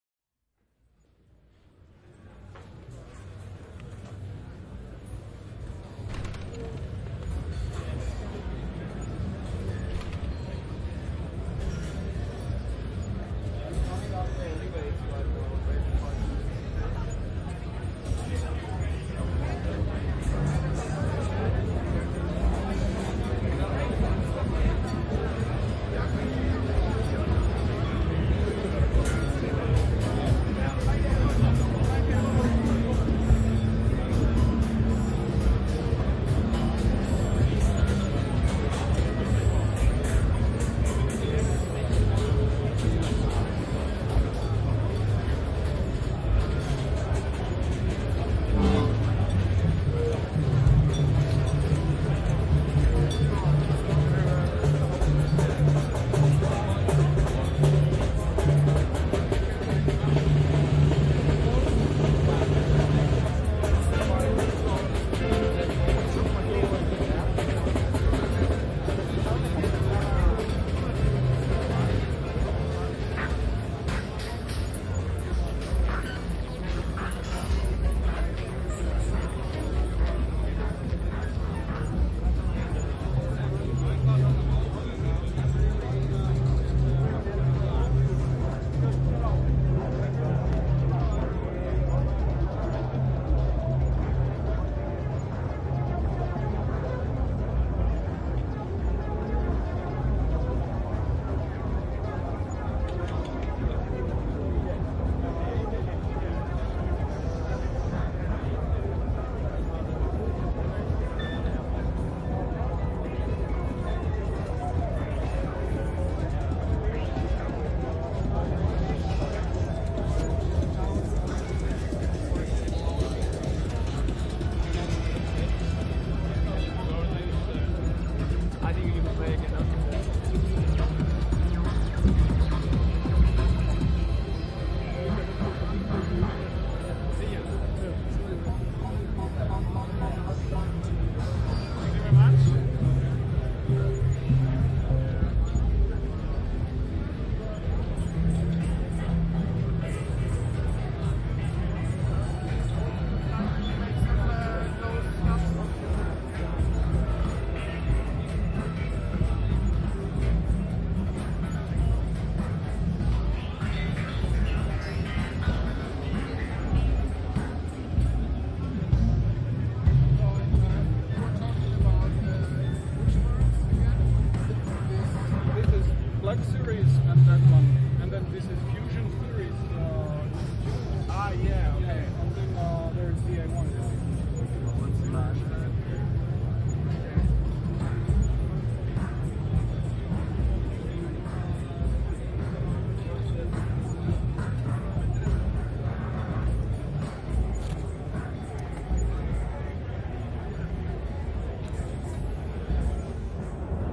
walking around Superbooth
walking around music electronics convention Superbooth at FEZ in Berlin Wuhlheide. circa 2019.